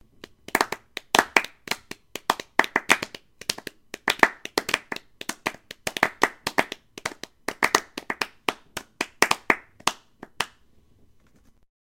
Unsure clapping sound for after a really bad performance.
Recorded using Garageband with a Blue Yeti Microphone.